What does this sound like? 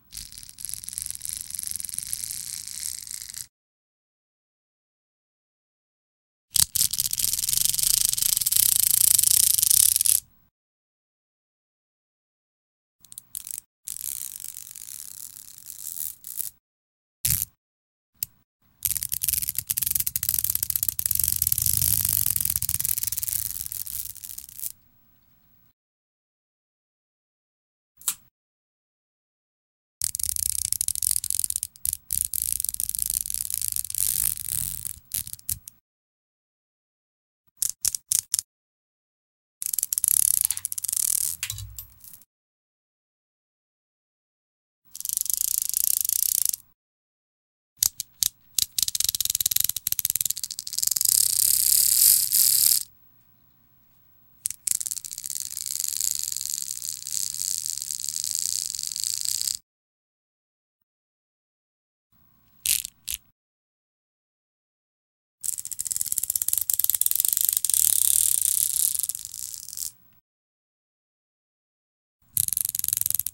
Beads-Pulled-by JGrimm
Various zipping sounds. Great for cartoon animation vids.
USES: Cartoon car driving off. Robot movements. Titles, words, and letters sliding from the side of the screen.
Use the zipping sound of the beads being pulled to create a feeling of something moving from here to there by editing the sound.
-[ RECORDING INFO ]-
Created by pulling a long strand of Christmas Tree beads in my hands while in front of the mic.
STUDIO MIC: AT4033
RECORDED IN: Adobe Audition 3
MASTERED: Using EQ, Compressed, Noise Gated, and Normalized to -.1
*NO CREDIT IS EVER NEEDED TO USE MY SAMPLES!
car, cartoon, robot, sounds